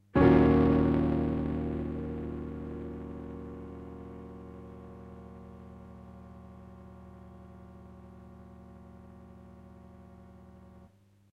Piano chord explosion
Short electronic piano chord with effect of explosion
electronic, explosion, explosive, piano, short